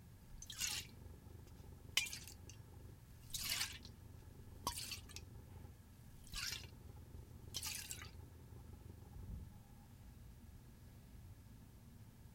Liquid in a Can
This is the sound of liquid moving around an aerosol can.